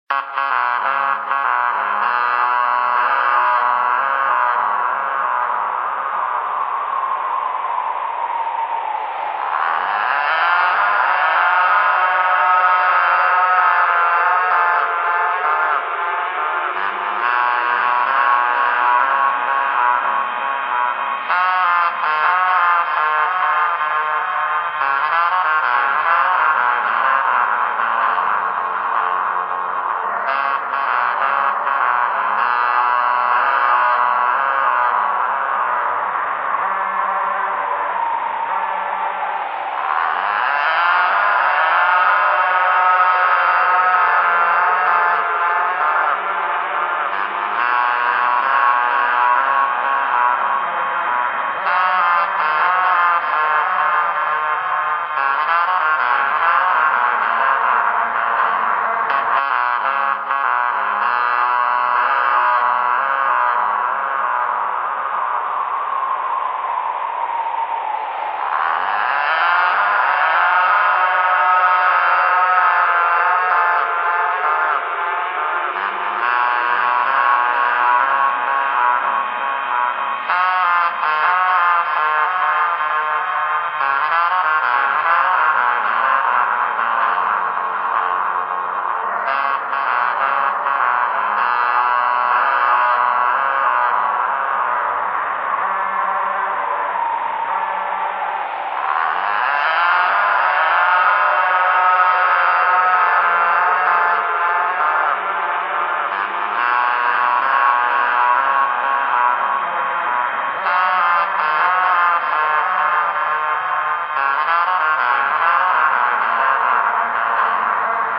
This sound is from a collection of Sound FX I created called Sounds from the Strange. These sounds were created using various efx processors such as Vocoders, Automatic filtering, Reverb, Delay and more. They are very different, weird, obscure and unique. They can be used in a wide variety of visual settings. Great for Horror Scenes, Nature, and Science Documentaries. This is how a cold winter sounds
aggressive, big, bright, calm, chaotic, confused, dark, Different, discovery, disturbing, Efx, FX, Nature, Sound, Soundtrack, Space, Strange, Transformational, Ufo, Unique, Universe, Weird